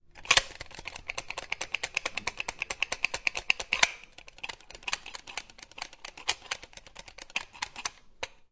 mySound MES Hamad
Barcelona, Mediterania, mySound, Spain